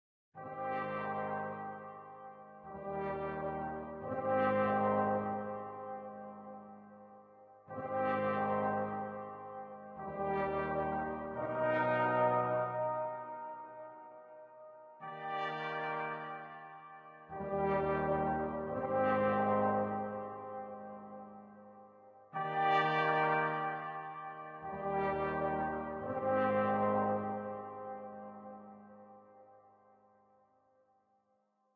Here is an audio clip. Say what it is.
horn lilt 2
It samples String Quartet No. 12 in F Major, Op.
ambient, brass, haunting, horns, orchestral